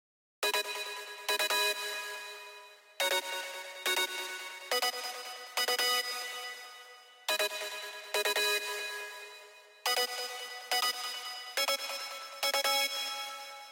140 BPM Stutter Chords
Trance, EDM, Chords, Electric, Sample, Dance